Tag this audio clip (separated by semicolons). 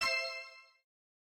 item; coin; menu; pickup; videogame